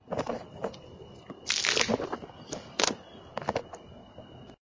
Water falling onde the floor.Free use
nature, work